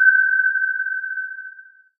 I analyzed a recording of a submarine ping and then synthesized a facsimile using my own JSyd software.